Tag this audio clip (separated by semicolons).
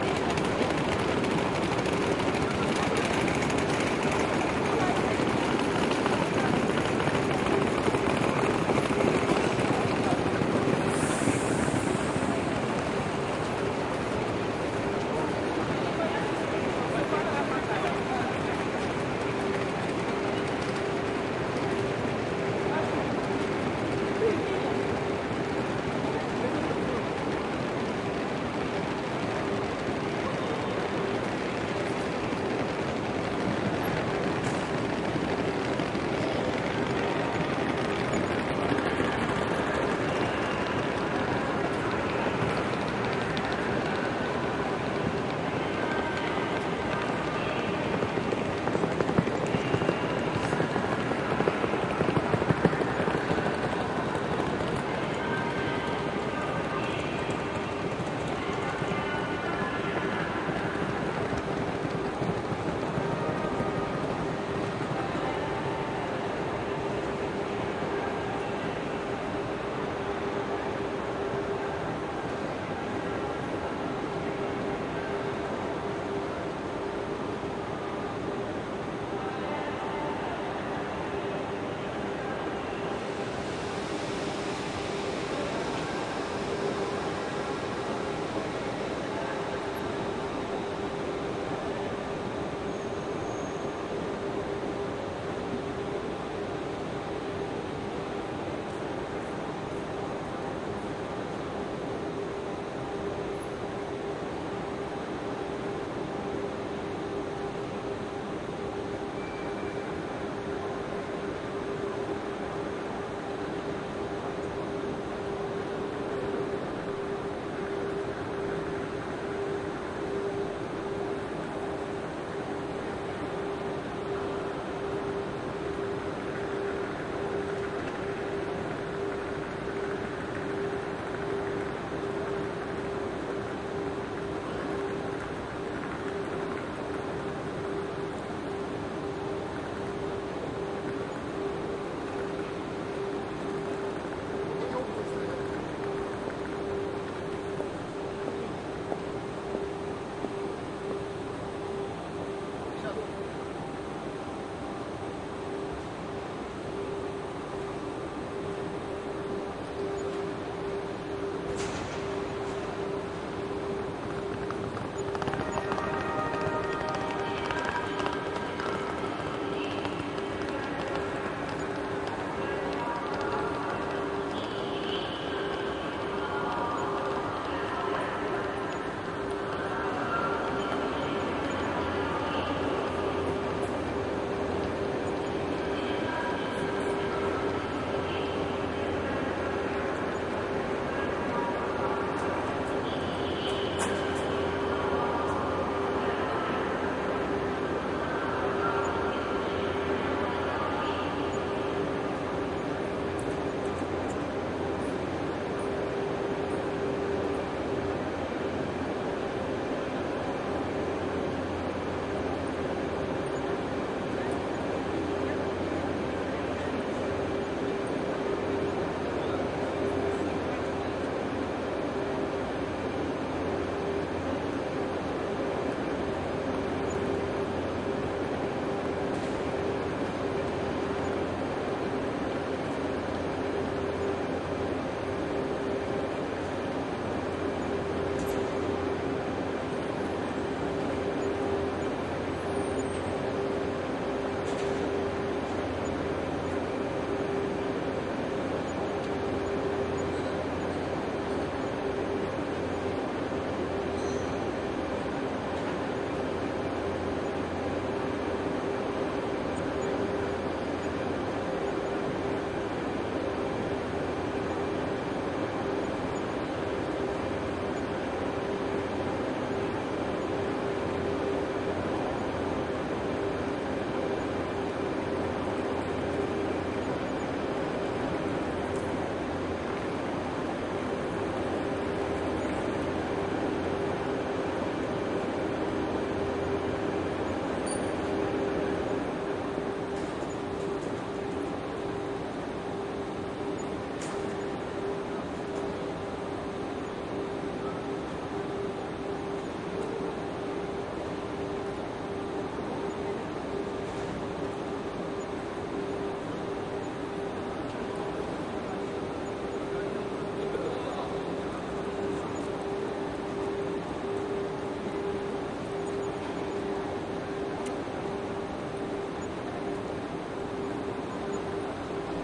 conditioning-systems; luggage; metallic-locker; passengers; pneumatic-mechanism; railway-announcement; railway-speaker; railway-station; road-surface; suitcase-wheels; talks; tile-on-the-ground; train